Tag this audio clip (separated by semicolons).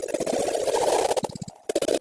audio; photo